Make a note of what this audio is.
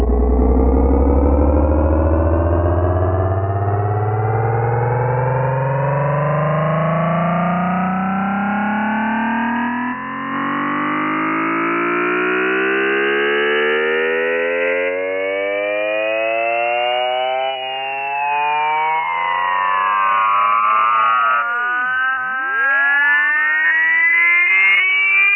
An experiment to see how many sounds I could make from a monophonic snippet of human speech processed in Cool Edit. Some are mono and some are stereo, Some are organic sounding and some are synthetic in nature. Some are close to the original and some are far from it.
processed, sound, soundscape, synthetic